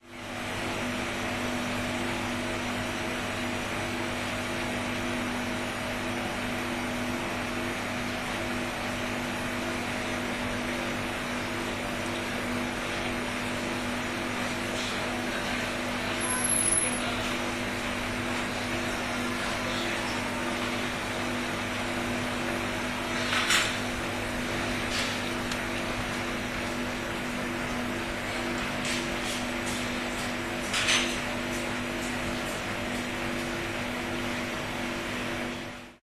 drinks machine 211010
21.10.10: about 16.30. the 3 floor in WSNHiD (School of Humanities and Journalism). the vice-chancellor floor. the sound produced by the drinks machine.
drinks-machine, poland, field-recirding, buzz, hall, school, university, machine, poznan